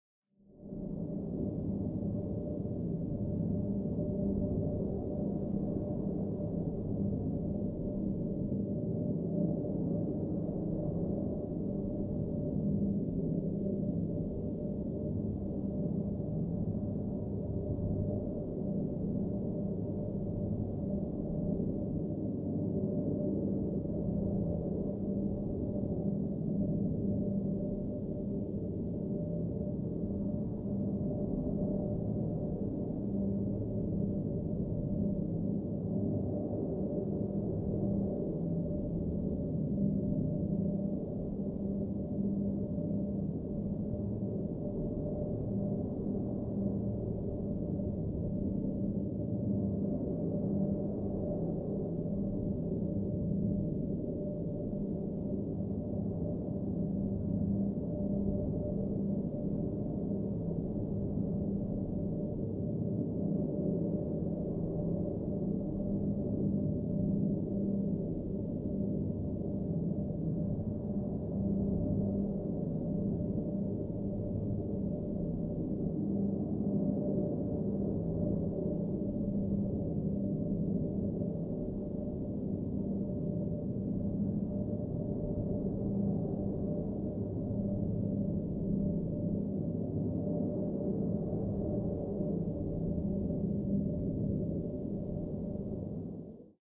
ambience with modulation
A recording of a room-ambience (CAD M179) that was processed in Reaper using a reverb with strong modulation.
ambience, background, modulation, sfx, synthetic